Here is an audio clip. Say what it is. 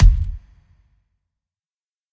kik9b-wet
experimental, hits, idm, kit, techno